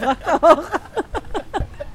people laughing outdoors 003
laughing outdoors women
funny, people, voice, field-recording, laughter, women, woman, female, laughing, jolly, laugh, outdoors, giggle